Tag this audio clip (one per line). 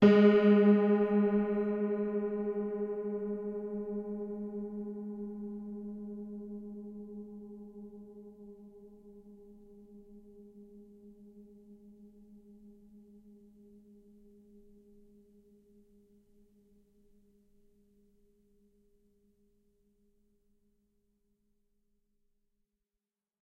detuned
horror
pedal
sustain